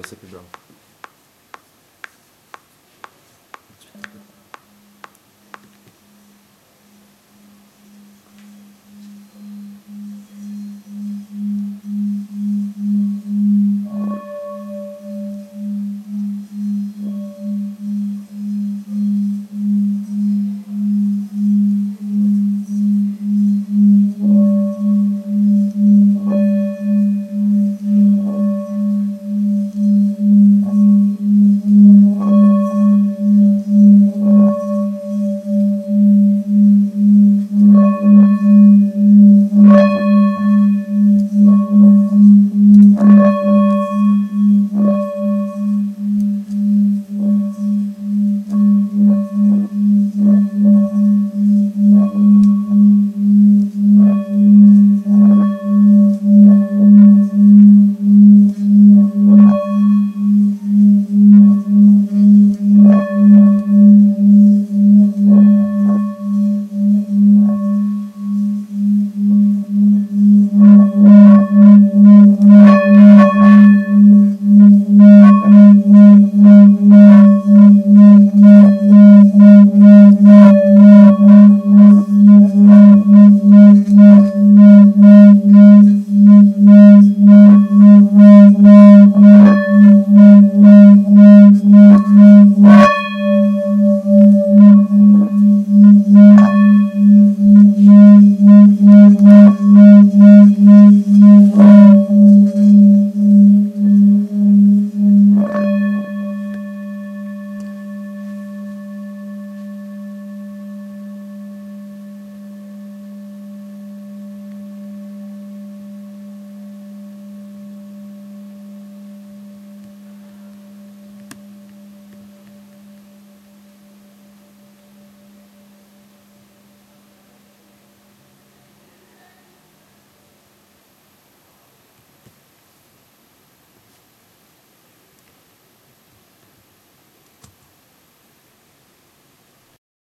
hit and stroke of a Tibetan bell recorded in a basement